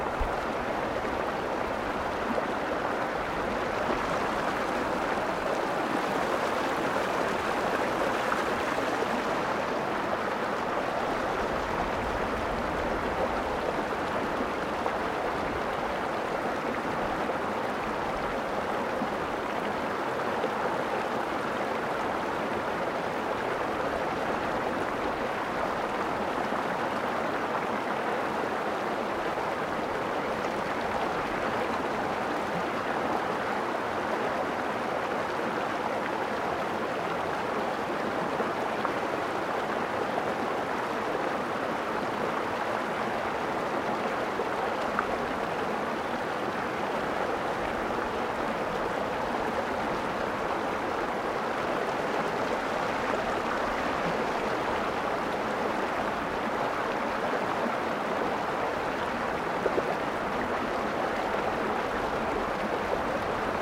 River sound recorded with a Zoom H4n